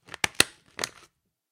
Tacker sound
A tacker in an office